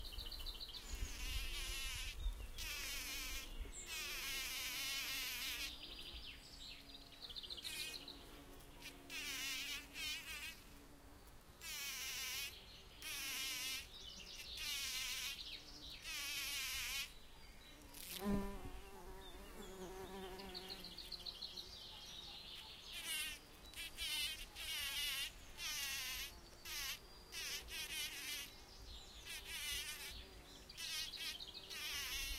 A busy bee getting into and out of a flower bell. Birds chirp in the background.